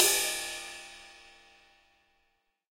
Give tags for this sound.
dw; percussion; cymbals; tama; sabian; ludwig; kit; zildjian; pearl; bell; bells; rides; paiste; sample; ride; cymbal; crash; yamaha; drum; drums

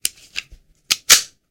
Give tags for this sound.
pistol; reload

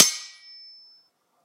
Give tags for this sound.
ding; steel; ping; metal; metallic; slashing; clash; metal-on-metal; swords; knife; ringing; clang; iPod; ring; clank; strike; clashing; impact; sword; clanging; ting; struck; slash; hit; stainless

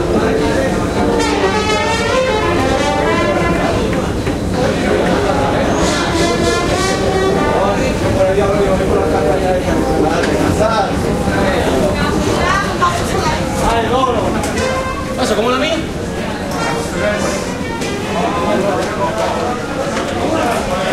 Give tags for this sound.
ambiance; city; crowd; musician; subway; trumpet

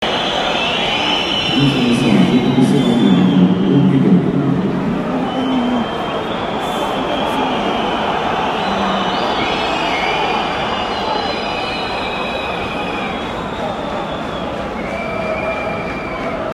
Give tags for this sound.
Benfica; fans; football; match; stadium; voice

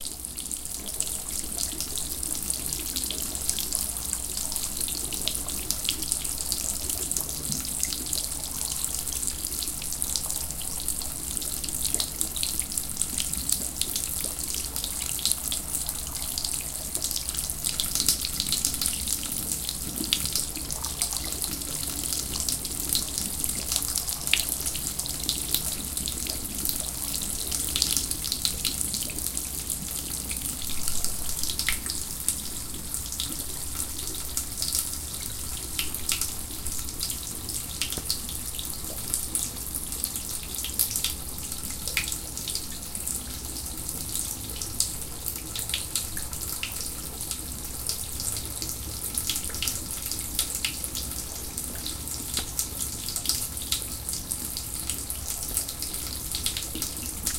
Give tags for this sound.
bathroom; drain

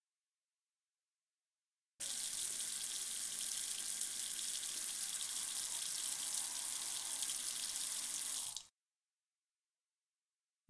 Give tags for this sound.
CZ; Panska; bathroom; tap; water; Czech

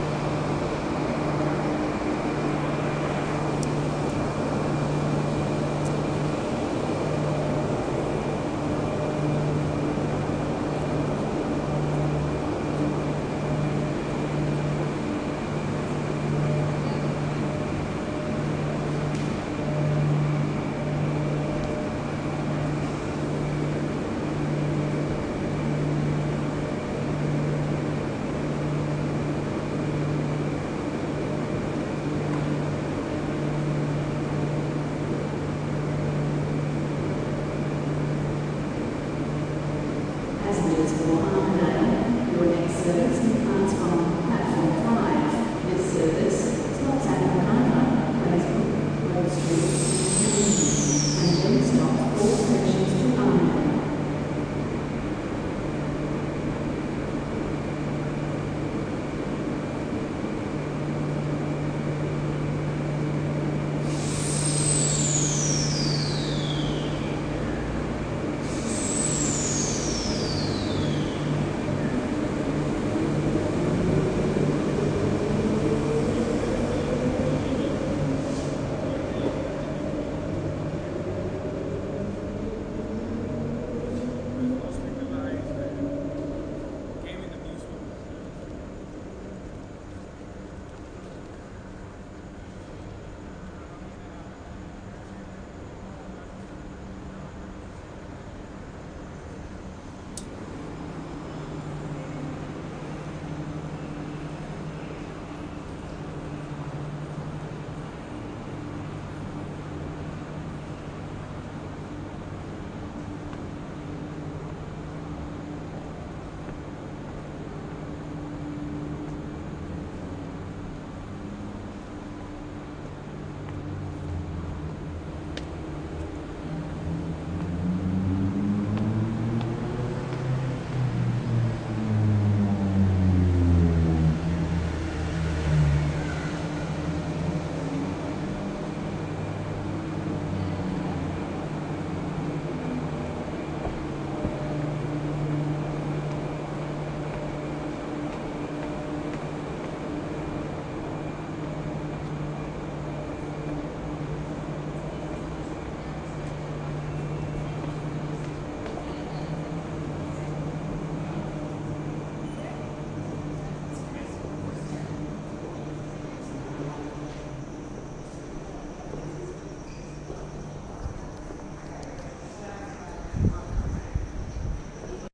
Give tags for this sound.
perth; station; train; transperth; underground; walking

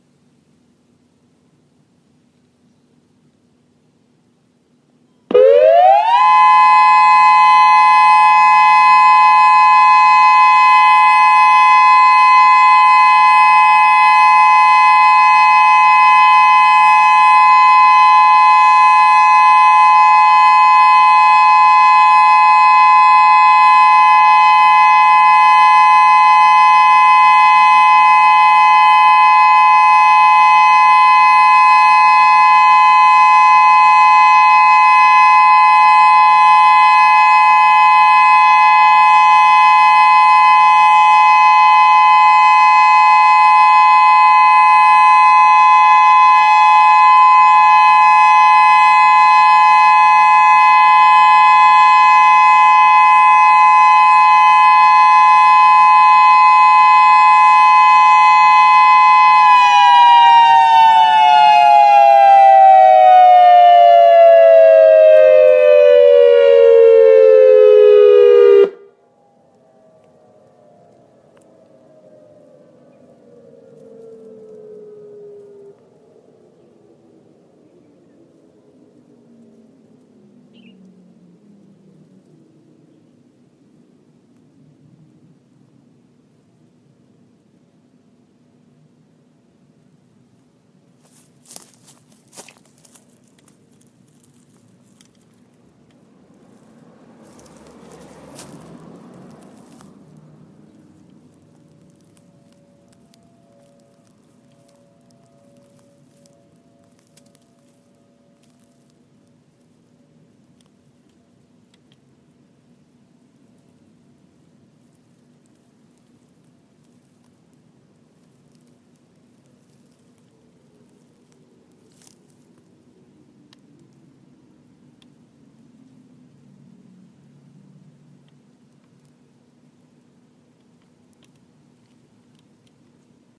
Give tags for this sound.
Civil; Defense; DSA; Federal; Signal; Sirens

